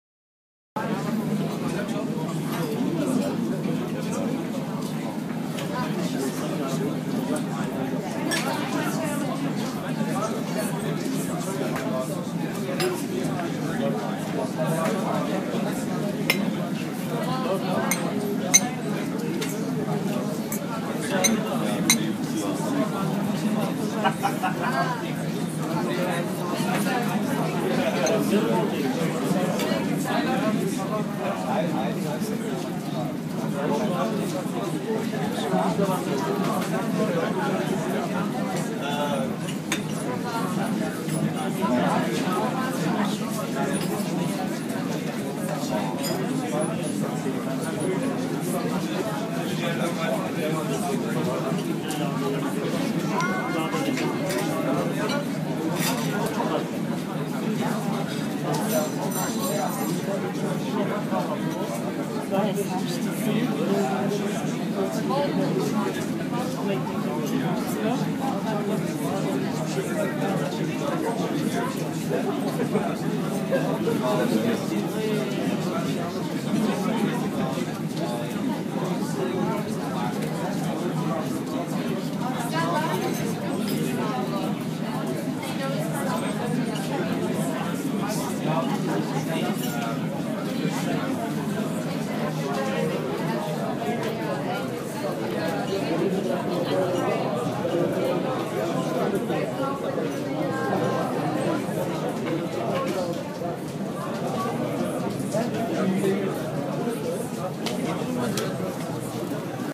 restaurant ambiance
these sounds were recorded on a rainy day in a garden of rastaurant